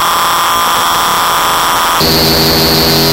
Robot Malfunction
digital, modular